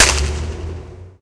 Impulse responses recorded while walking around downtown with a cap gun, a few party poppers, and the DS-40. Most have a clean (raw) version and a noise reduced version. Some have different edit versions.